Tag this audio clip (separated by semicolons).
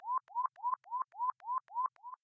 blipp; radar; ping